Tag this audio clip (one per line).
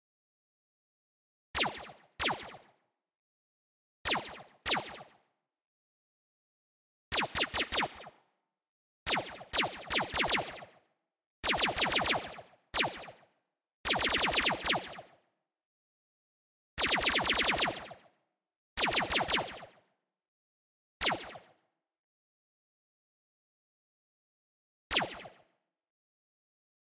laser
sci-fi
space